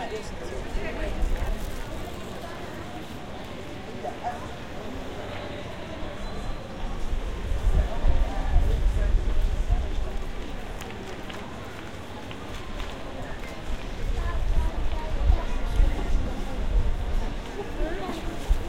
Sounds of passers by in a french small town. There are sounds music, which is a sound check for a concert being setup in a nearby square.
talking, music